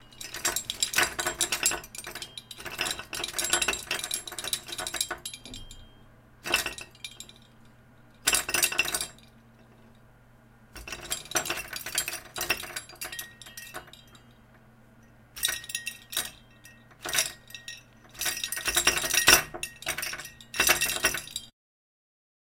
Medal sounds
Multiple medals clinking against each other, hanging from a wooden door
metal-against-door, OWI, awards, medals, clinking